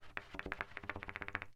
chopper long-p-fast .R
recordings of variouts trumpet extended techniques, performed by David Bithell, recorded by Ali Momeni with a Neumann mics (marked .L) and an earthwords (marked .R). Dynamics are indicated with from pp (very soft) to ff (very loud). V indecas valve, s and l indicate short and long, pitches in names indicate fingered pitches,
davood, rumble